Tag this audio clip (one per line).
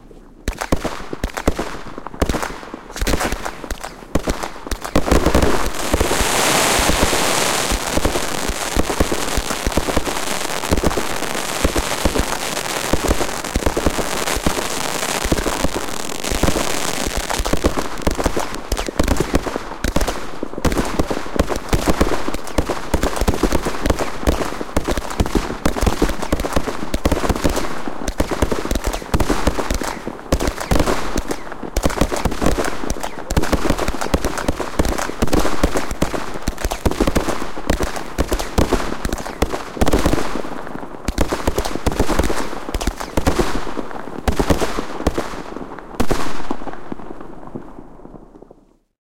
explosion
field-recording